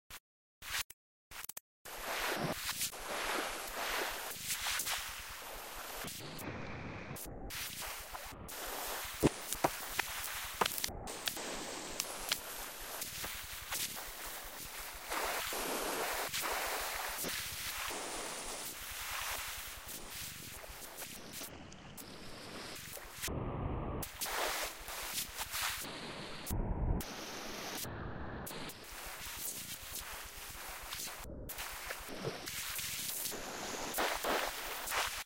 This is what the sea sounds like through dfx Scrubby-VST's in collab with Steinberg Wavelab's microtronic circuits. Hope you enjoy it. I am not allowed to geotag this file (although that is somewhat arbitrary: the sound which is stored in and as this file has actually been produced and heard as such for the first time at this very location where I am sitting at this moment still). If only I were allowed, then... : Utrecht, The Netherlands.